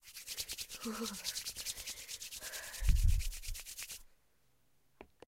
Person Rub Hands Together 1
Recorded with a black Sony digital IC voice recorder.
cold, hands, rub, shiver, together